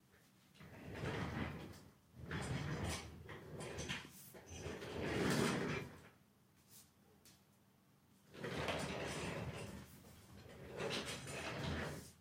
Chair moving on a wood floor
Chair moving around on a wooden floor
Chair, Drag, OWI, Pulled, Push, Wood-floor